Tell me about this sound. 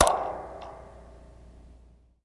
Impulse responses made with a cheap spring powered reverb microphone and a cap gun, hand claps, balloon pops, underwater recordings, soda cans, and various other sources.